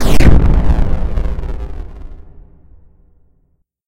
large explosion 2
Made with Audacity with a 8 bit explosion sound as base.